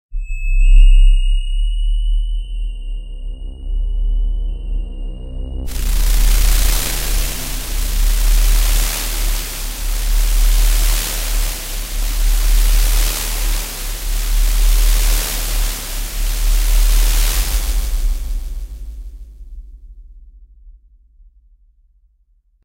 dub siren 4 1
A sequence of pulsating effects and noise.
alarm, dub, effect, fx, rasta, reggae, reverb, scifi, siren, space, synthedit, synthesized